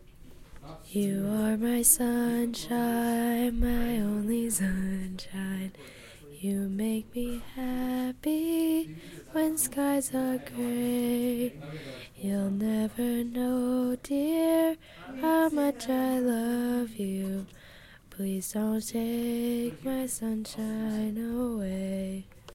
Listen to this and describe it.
Grace sings "You are my Sunshine"
singing, song, sunshine